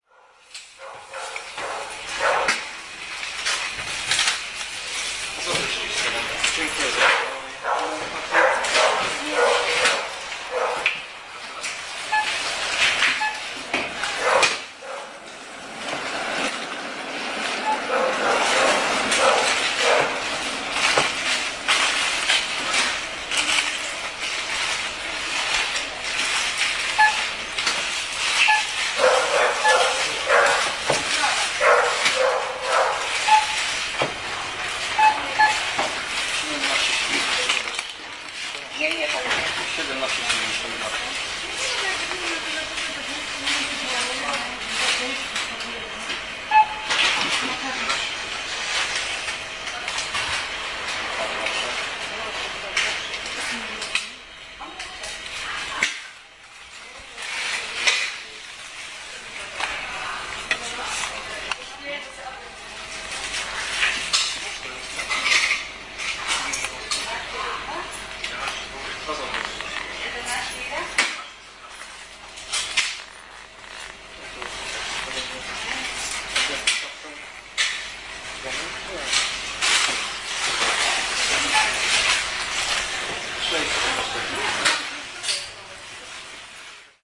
biedronka supermarket100710
10.07.2010: 20.50 in the Biedronka Supermarket straight before closing. The supermarket is located in old cinema "Wilda" on the Wierzbiecice street in the Wilda district in Poznan/Poland. I have to admit that sounds are quite strange as for a supermarket: barking dog, passing by tramway... The door were opened and these sounds are from outside. Of course there are typical sounds audible like cash desk beeping, coins sound, cashier questions, clients answers.